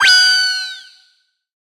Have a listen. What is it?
Moon Fauna - 24
Some synthetic animal vocalizations for you. Hop on your pitch bend wheel and make them even stranger. Distort them and freak out your neighbors.
animal, sfx, synthetic, vocalization, creature